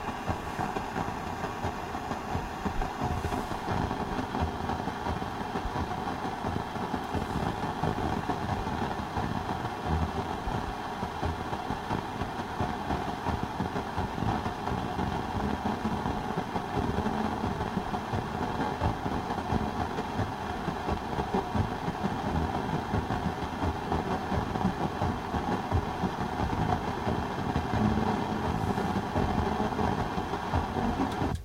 rhythmic radio static, faintly picking up a drum beat.
radio, noise, static